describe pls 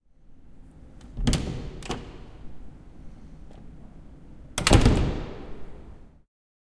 STE-027 Open door from inside
Open the door of a classroom leaving it.
campus-upf, classroom, UPF-CS12, open